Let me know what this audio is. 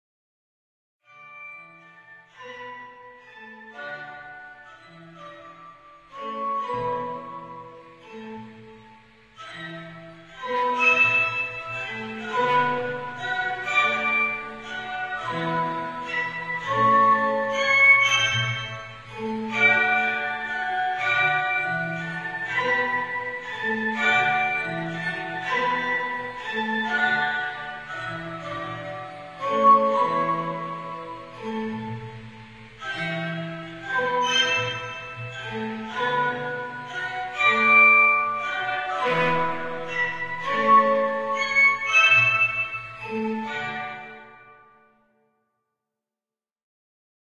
A collection of creepy music box clips I created, using an old Fisher Price Record Player Music Box, an old smartphone, Windows Movie Maker and Mixcraft 5.